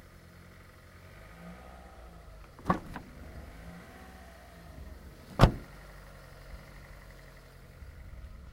Open and closing Car door with running engine
car, door